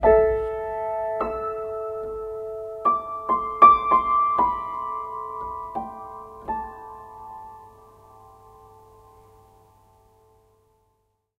Short Piano Intro 3
Short simple Piano intro for various use in movies and games. You can find more like these in my pack "Piano Intros"
;) Thank you!
audacity, cinematic, recording, Beautiful, dramatic, movie, Keys, Background, Tascam-DR40, Ambiance, Short-film, Piano, game, film, Field-recorder, music, Tascam